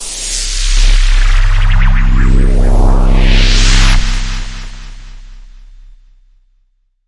Dramatic Hit
trailer, game, hit, video, inception, design, thrill, effect, movie, boom, title, cinematic, Free, Tension, intro, film, drama, dramatic, boomer, Impact